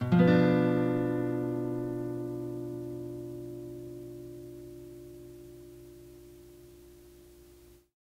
Tape Ac Guitar 13
Lo-fi tape samples at your disposal.